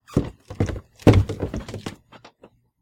Footsteps-Stairs-Wooden-Hollow-03
This is the sound of someone walking/running up a short flight of wooden basement stairs.
Run, Footstep, Step, Hollow, Wood, Walk, Wooden, Stairs